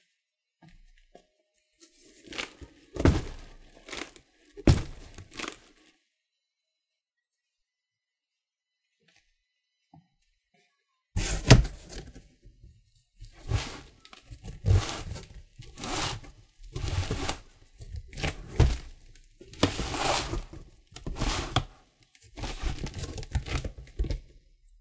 Sounds of dragging a cardboard box with some dumbbells inside. Recorded on Blue Yeti.
box, cardboard